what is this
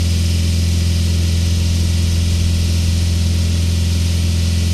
Loopable clip featuring a Mercedes-Benz 190E-16V at approximately 2500RPM at full engine load. Mic'd with an Audix D6 1 foot behind the exhaust outlet.
benz, car, dynamometer, dyno, engine, mercedes, vehicle, vroom